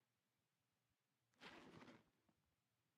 opening a bag with zipper